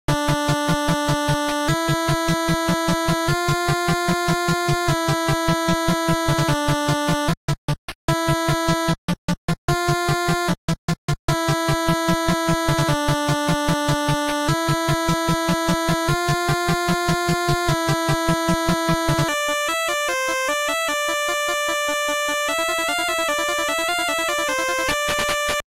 8-Bit - End Level
You can use this music to add more ambient to your 8-bit level!
Made with FamiTracker!
8bit, endboss, retro, level, chiptune, videogame, 8-bit